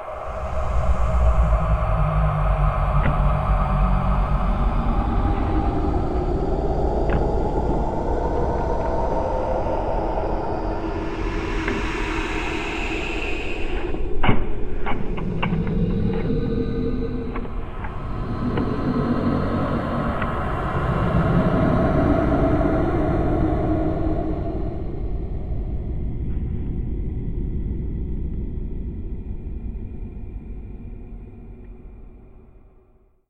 Drone sounds that are Intense and scary. Slow and breathy make it a true scary sounds.